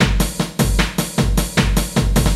Loop for Dance
A beat meant for looping for use in dance music. It uses one of the kick drums from crispydinner's "Heavy Pounding" pack, a snare drum the origin of which I can not remember, an open hi-hat from the Korg M1 and a modification of a snare from AudioSauna's sampler's kit "Drums - Back To 808's". It is set at a tempo of 152 beats per minute.
loop, 152-bpm, beat, dance